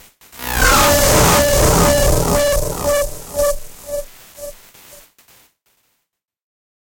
hard, over-driven analog sound made using the minikorg + use of EQ/Distortion/Compression in flstudio's patcher.
enjoy!
~dub